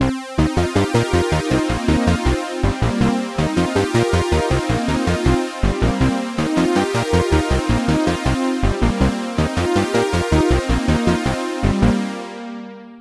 Retro melodic synthloop in 80 bpm 4 bars.
80bpm, melodic, 4bars, loop, analog, retro, experiment, Synth